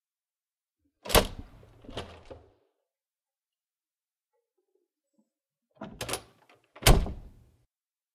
Hyacinthe door with push bar edited
door with push bar
push, door